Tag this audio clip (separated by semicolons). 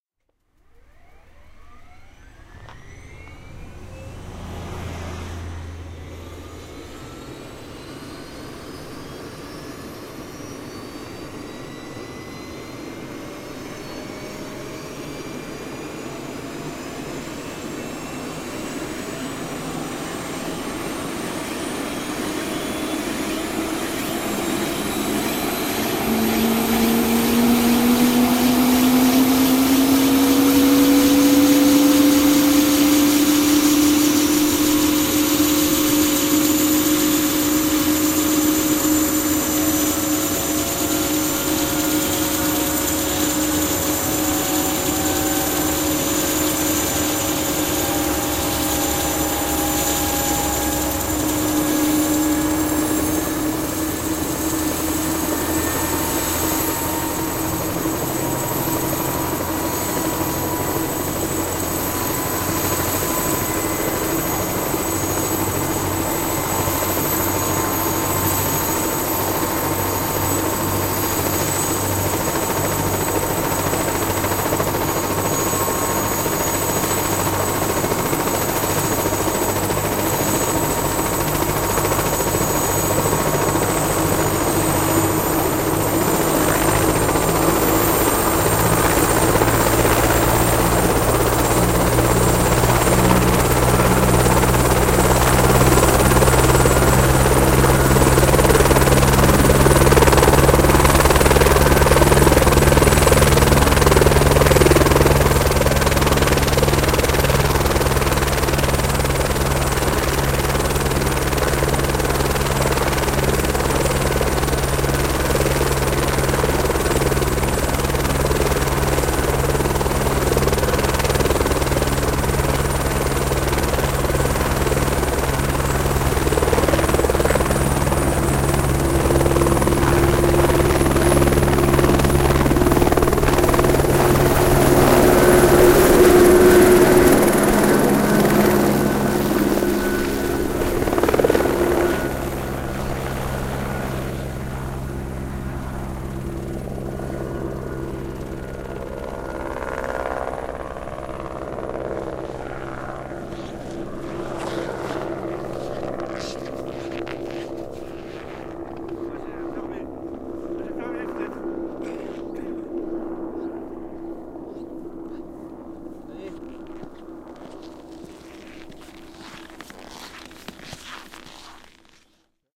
chopper; field; recording; snow